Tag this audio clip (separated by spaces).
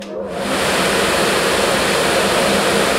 1bar metalwork suction tools vacuum field-recording fume 80bpm